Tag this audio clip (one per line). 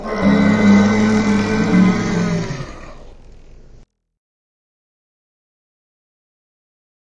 dino
jurassic
park
trex